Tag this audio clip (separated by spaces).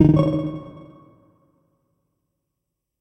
Effects Design Interface Sound GUI Game Beep SFX Menu